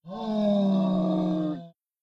A beast of burden of some kind. Sounds like something that sort of resembles a bull, in my opinion.
Recorded into Pro Tools with an Audio Technica AT 2035 through the Digidesign 003's preamps. Pitch shifted for an impression of larger size.